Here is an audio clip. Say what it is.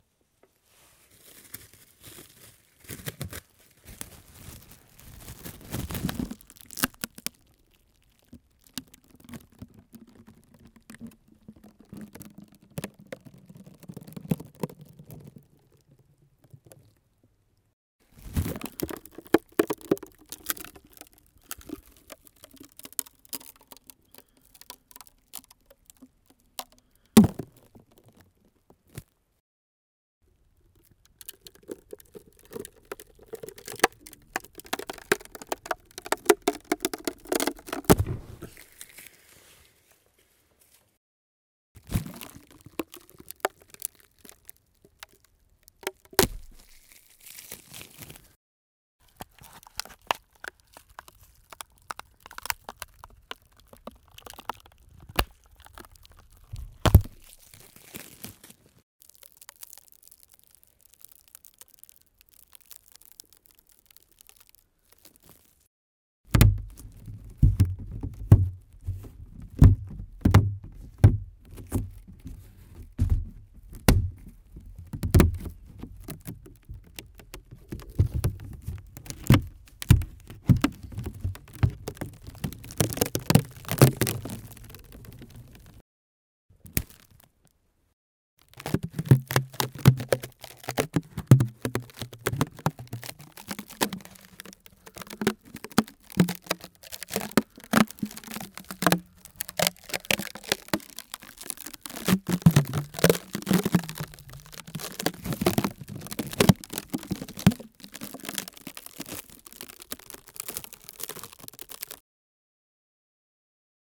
el increible mundo celofan
close-up, breaking, plastic, celofan, cracking, cellophane, elastic